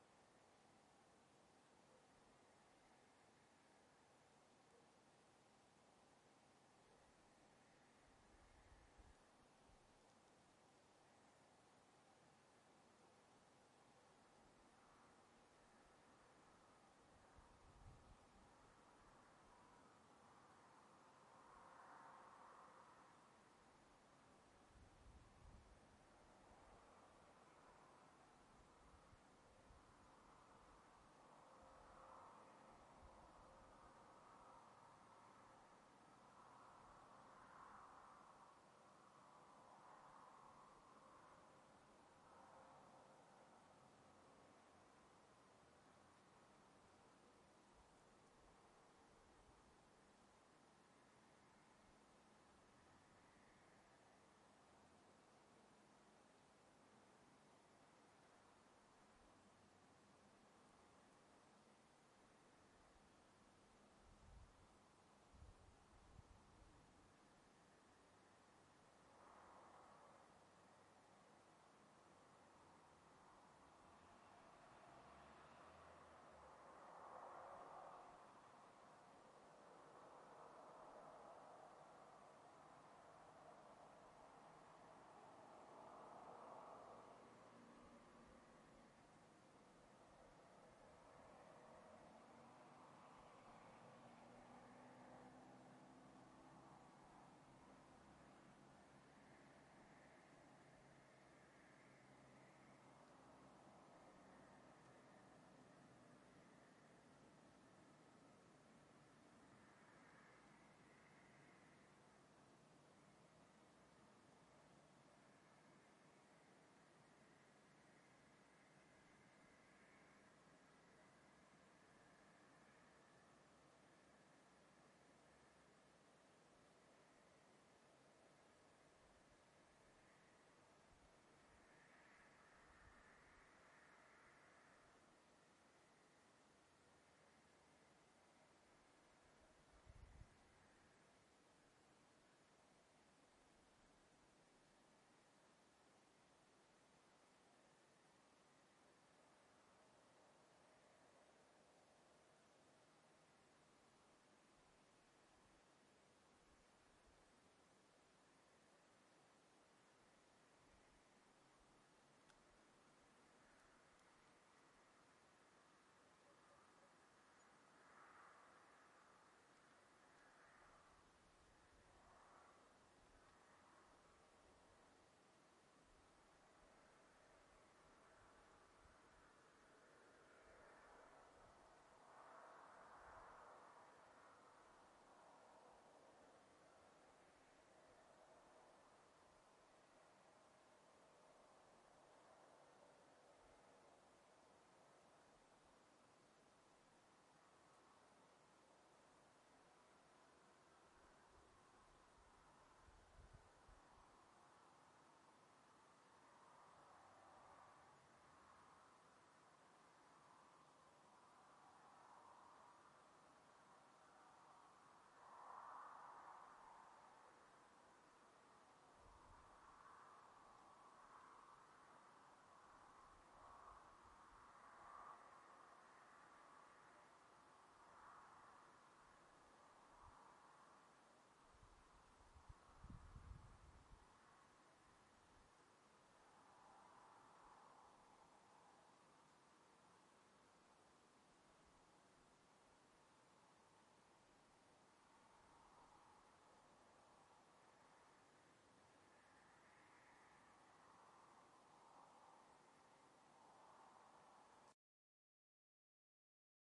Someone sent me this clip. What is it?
Field 3(traffic,cars)
Ambient sounds of a field.
field, exterior, ambient